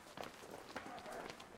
Running Footsteps Dog Bark
Running footsteps while a dog is barking.